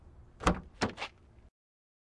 opening Car door
opening a car door
car, door